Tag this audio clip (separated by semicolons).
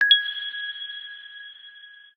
game; pick-up; object; item; diamond; note; coin